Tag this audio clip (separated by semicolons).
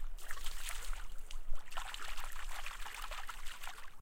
rock; water; nature